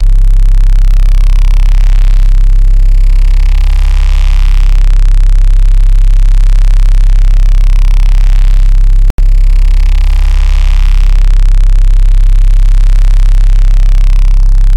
ABRSV RCS 044
Driven reece bass, recorded in C, cycled (with loop points)